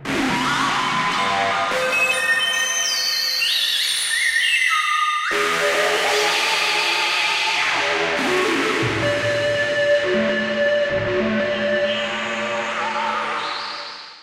Electric Guitar 5
An emulation of electric guitar synthesized in u-he's modular synthesizer Zebra, recorded live to disk and edited in BIAS Peak.